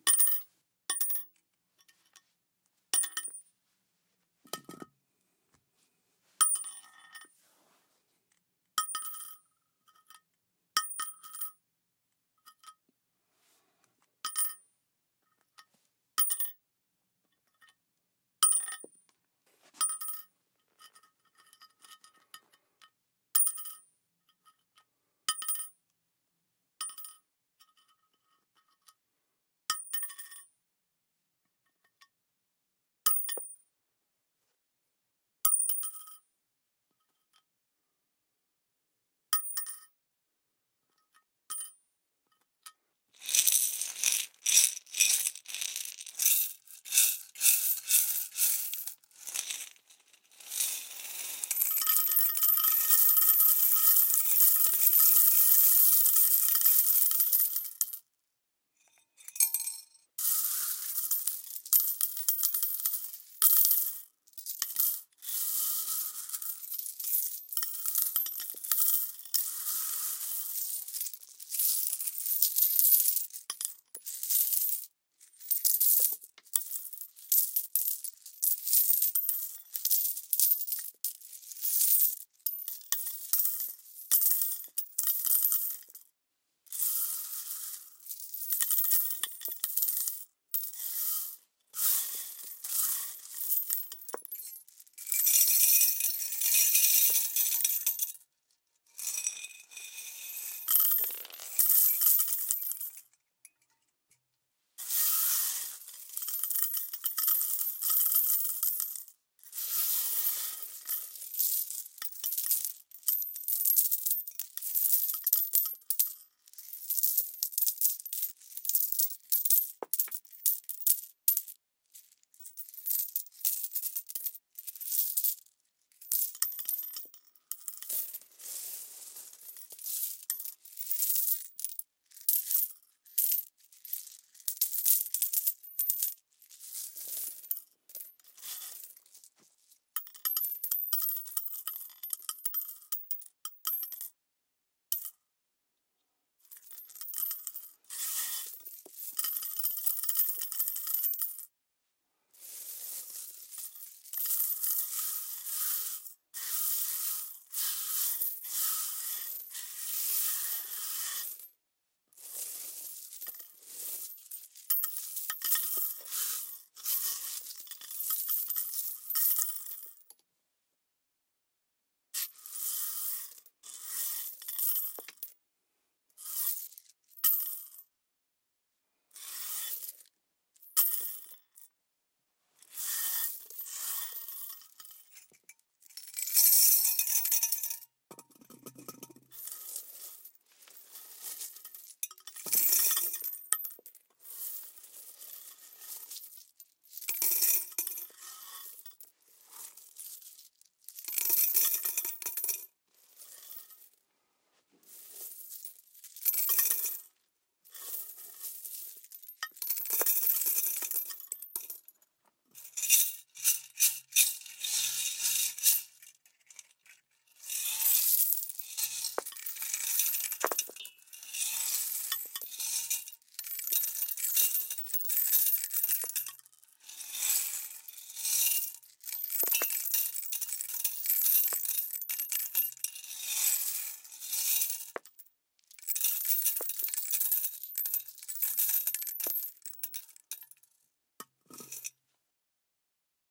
coin drop multiple glass bottle concrete money treasure gold-002
drop, clean, bottle, treasure, gold, money